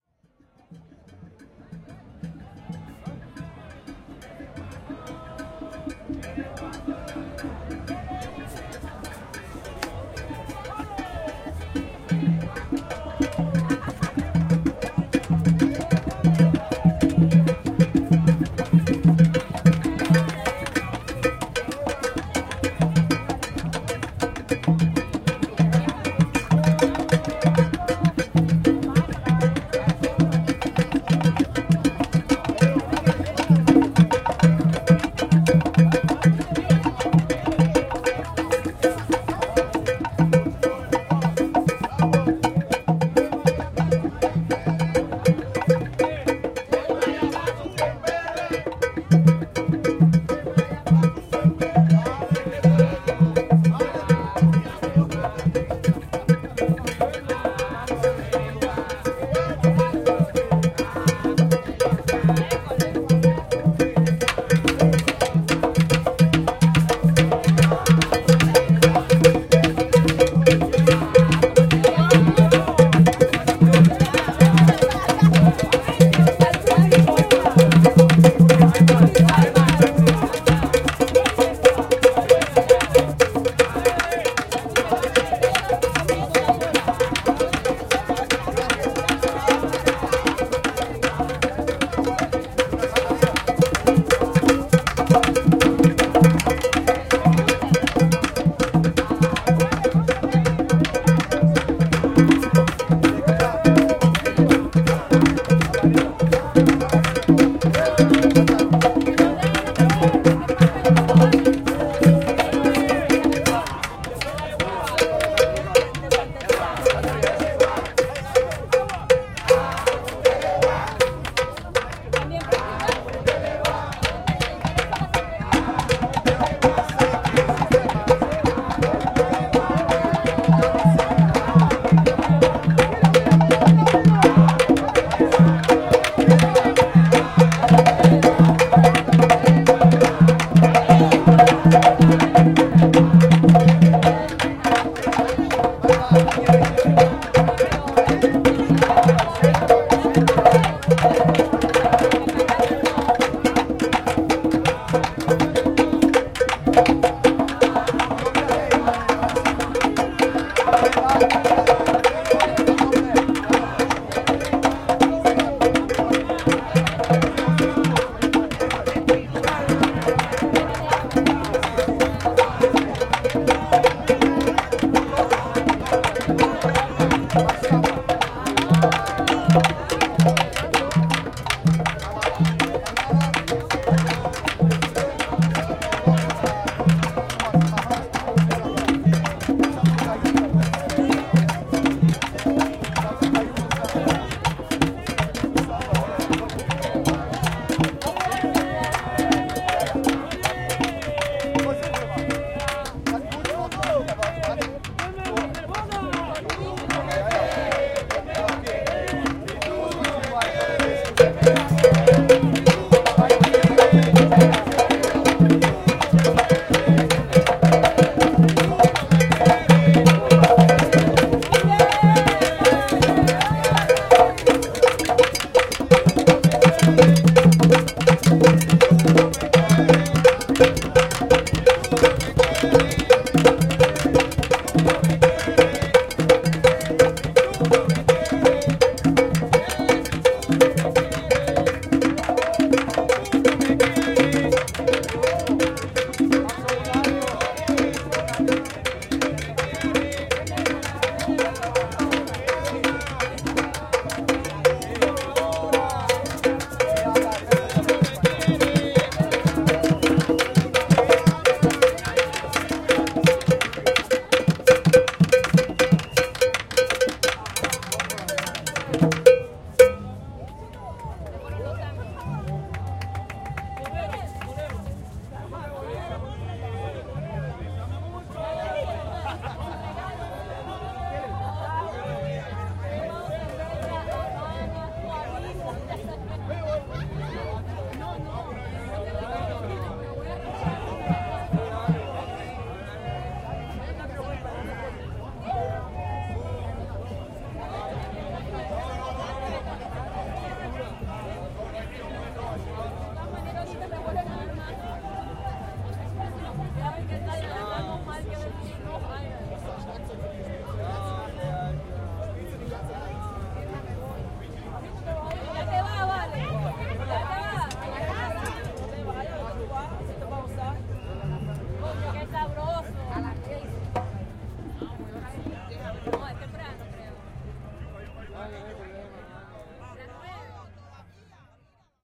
Group of Cuban drummers performing Santeria style drumming surrounded by a lot of listeners from Latin America, drinking Cuban rum, talking and commenting the performance. The recording was made during the Berlin Carnival of Cultures in 2011, Bluecherplatz in the quarter of Kreuzberg. Zoom H4n